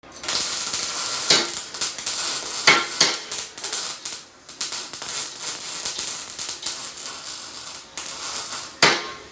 Simple welding with a few thumps of the hammer on the metal.